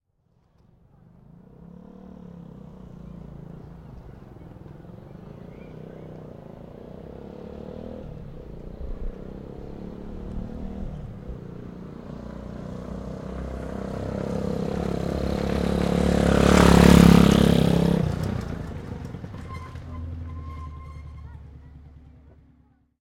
Jerry Honda 125 Ride Past
The sound of a Honda 125cc motorcycle riding past at around 20 mph
bike, engine, honda, motor, motorbike, motorcycle, rev, ride